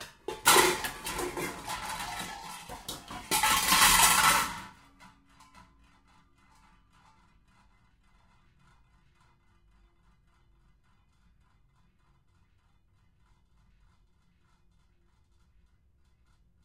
pots n pans 04
pots and pans banging around in a kitchen
recorded on 10 September 2009 using a Zoom H4 recorder
pans, rummaging, kitchen, pots